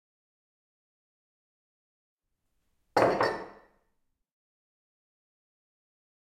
putting of glass by barman
Barman is putting glass on the table. Strong noise.